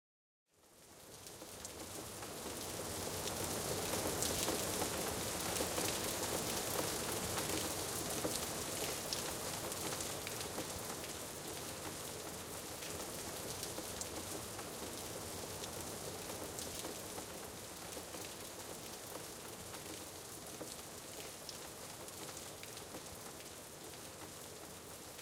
Rain light/moderate falls on the balkony made out of stone. High Quality Recording with Rode NT1A (two times, stereo-mixdown). The rain sounds very close to the mic, very clear and with all the high frequencies catched.
Hope someone can use it for whatever...i used it as an intro in a song :-)